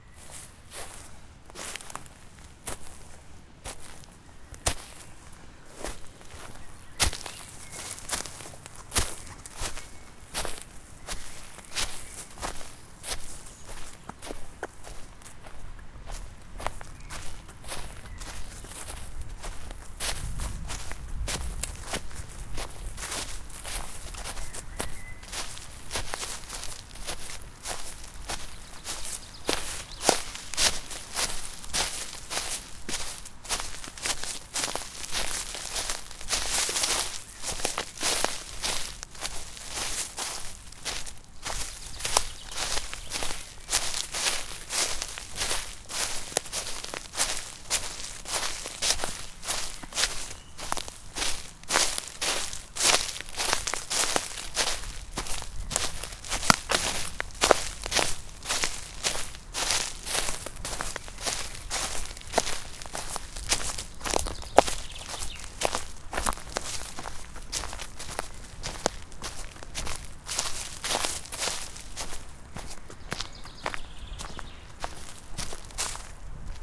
Walking on gravel and leaves in the forest